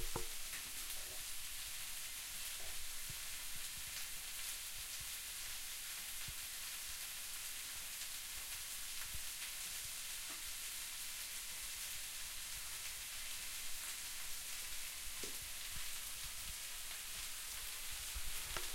!rm frying food
Cooking some food recorded with Zoom H4n recorder.
cook, cooking, fire, food, fry, frying, heat, pan, steak, stove